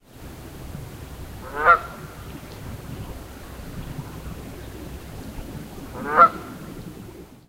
Some geese honks.